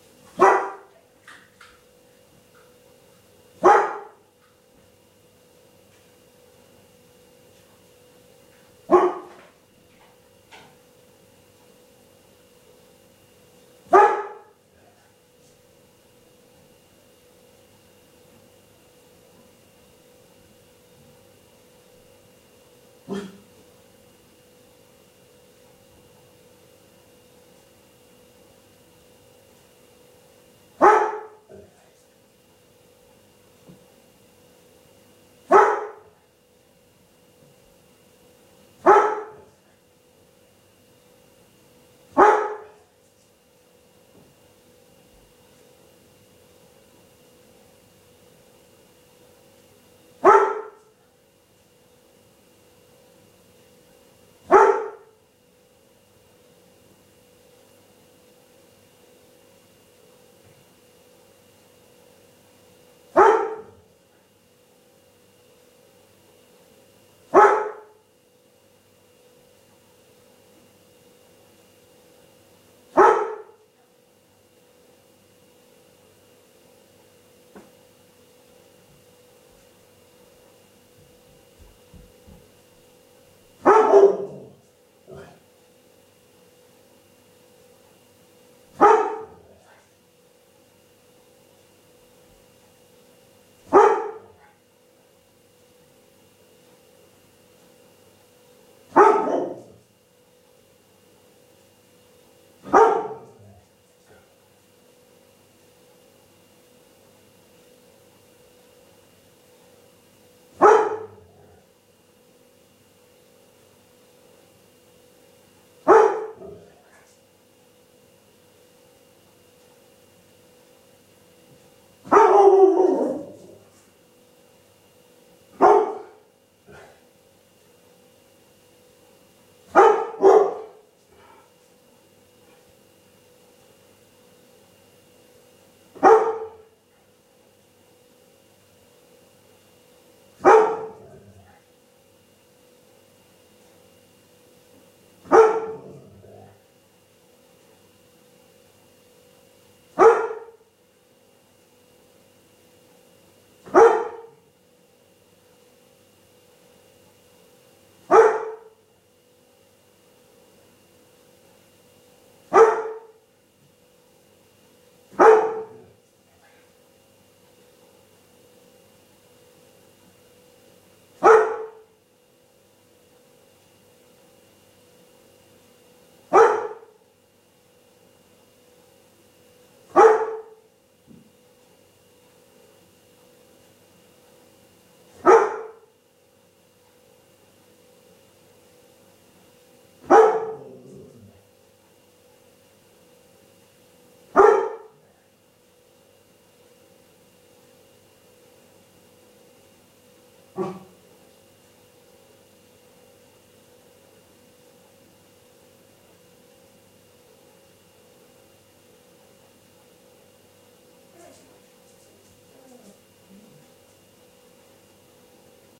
Dog Barking
A male Labrador retriever barks loudly in the morning.
bark, barking, dog, field-recording